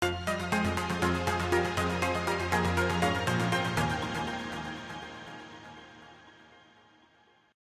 DL - 120 BPM TV waiting loop
Music fill for short waiting period in TV. For example few seconds before news starts.